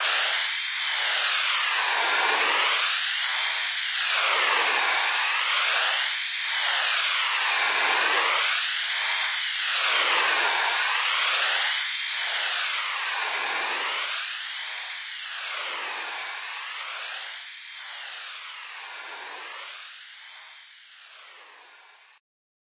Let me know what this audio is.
Street sweeper filtered mod.2
Street sweeper sound processed
source:
abstract, dark, digital, effect, electronic, freaky, future, fx, glitch, harsh, lo-fi, loud, moody, noise, sci-fi, sfx, sound, sound-design, sounddesign, sound-effect, soundeffect, strange, weird, white-noise